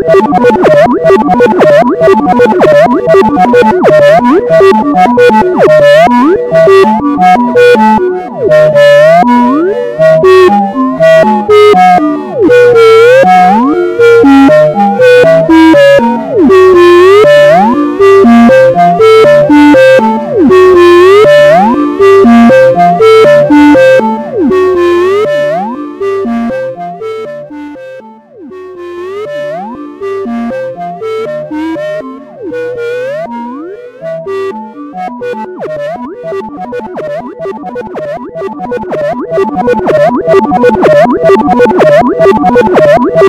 midian gates
i created this sample on my old keyboard.
peace, wierd, lcd